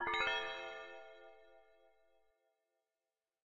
A bell rings out to inform customers via the public announcement speaker system.
sound, attention, announcement, public, custommers, commutor, train, subway, tram, call, speaker